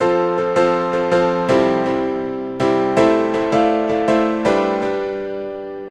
Lead piano used in Anthem 2007 by my band WaveSounds.
162-bpm, clean, full